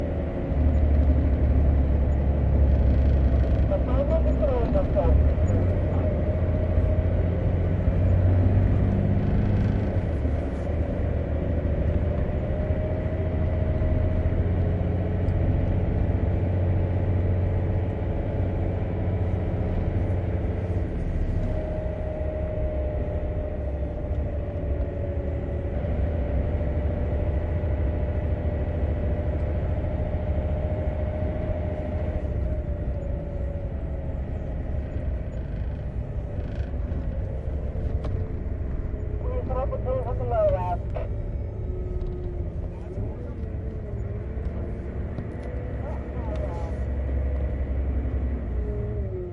truck-inside-02
Inside the cabin of a haul truck, filled with sand, driving.
loader, gears, transport, haul, inside, sand, driving, transportation, digging, fieldwork, lorry, truck, cabin, volvo